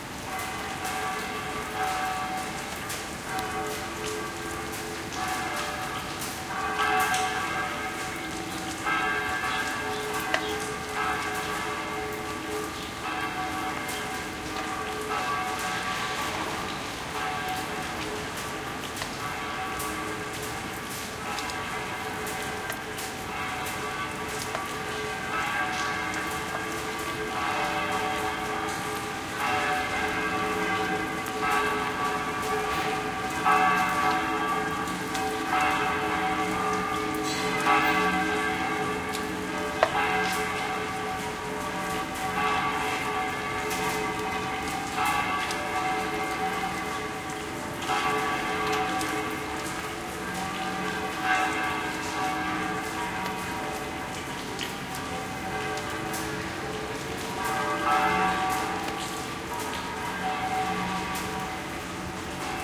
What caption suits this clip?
churchbells pealing on a rainy day. Senns MKH60 + MKH30 into Shure FP24 preamp and Edirol R09 recorder